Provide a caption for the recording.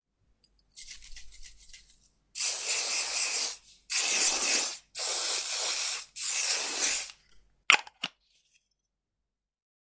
Shaking then putting on deodourant - recorded with internal mic's of a Zoom H2